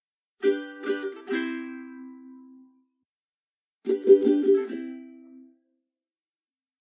Ukulele short theme
Short ukulele theme. After editing, it's a nice sound for the game :)
effect, game, jingle, joy, music, theme, ukulele